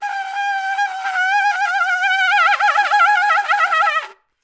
Playing the alto sax with vibrato with no mouthpiece, like a brass instrument.